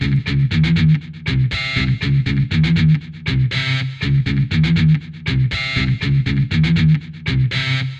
Short loopable crunchy guitar riff with added screechy bit. created in Samplitude, alas I have no real guitar!